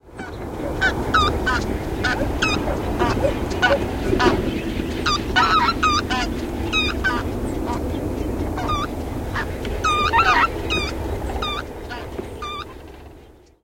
Recorded January 18th, 2011, just after sunset.
geese, sherman-island